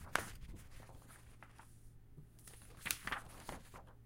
Rustling paper, recorded with Zoom h1n.
office, Paper